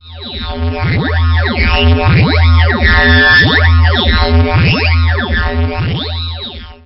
CharlotteRousseau 2013 2014 Didgeridoo
I generated a track :320 Hz. I used an effect "wahwah" and I modified the phase.
I changed the speed (-74). I normalized and I used a melted in opening and closing. it's made me think of the sound of the Didgeridoo.
instrument, Didgeridoo